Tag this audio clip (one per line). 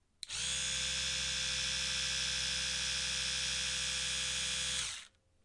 electric
whir
click